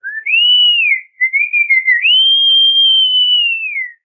sonido silbar grabado en aire libre
aire, ruido, libre